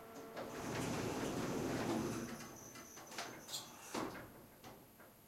A sliding metal lift door recorded from the exterior.
car-park, door, elevator, lift, mechanical, metal, sliding